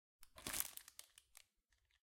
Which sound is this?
plastic foil crumble 02
Crumbling plastic foil and putting it in a plastic cup. Recorded for my documentary "Plastic Paradox"
Recorded with Zoom 4Hn, Stereo.
foley, plastic, cup, crumble, foil